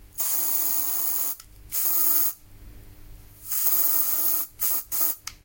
Spraying a deodorant spray.